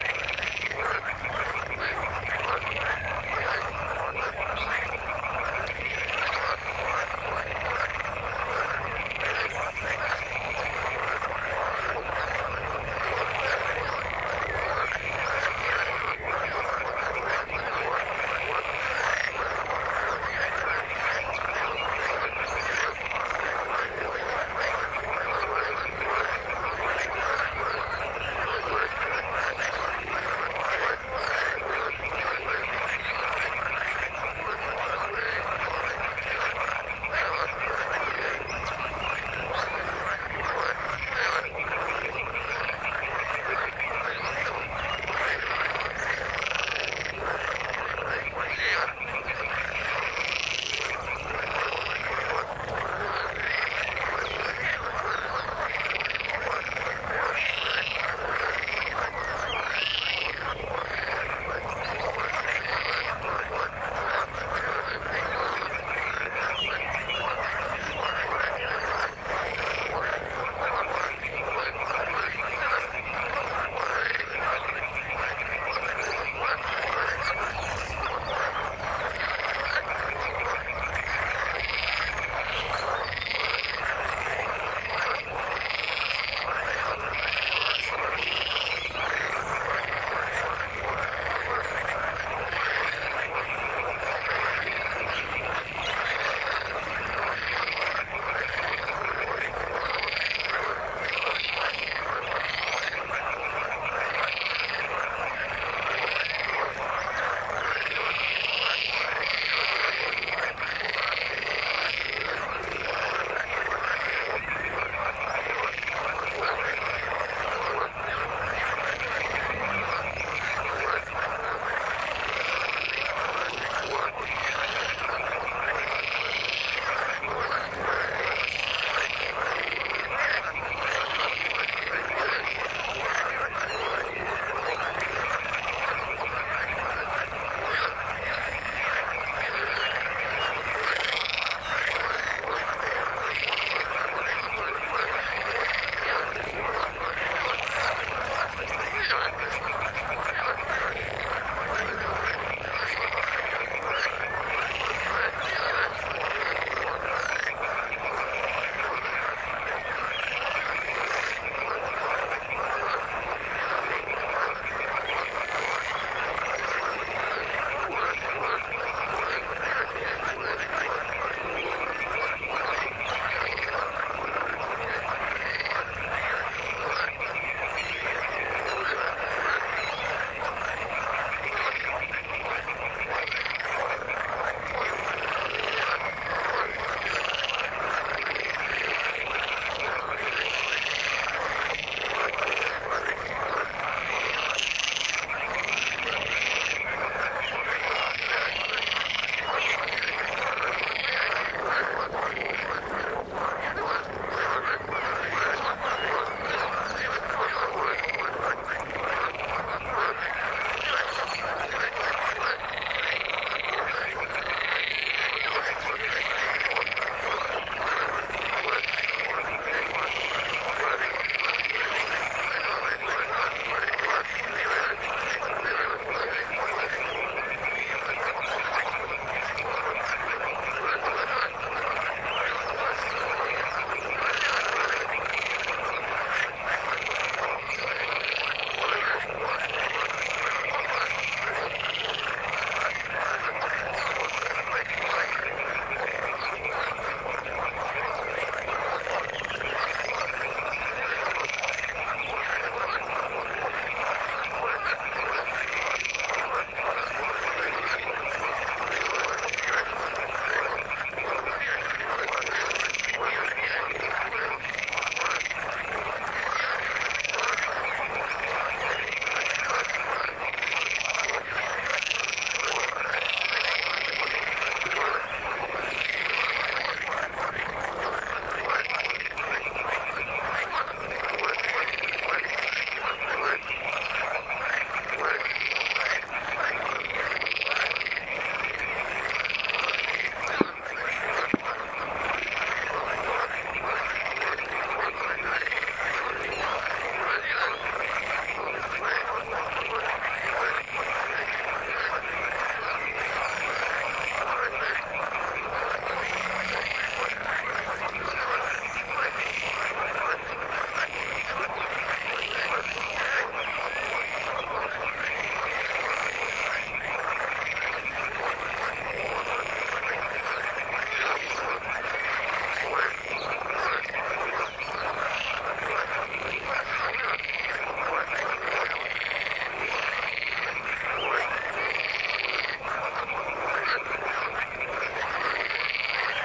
A small pond in the marshes, very lively with frogs singing their songs in spring. Recorded during sunset with Zoom H2n, no editing. You will also hear birds, waterbirds, and in the far background cars and even gunshots.
The money will help to maintain the website:
frogs, amphibia, lake, field-recording, marshes, spring, ambiance, pond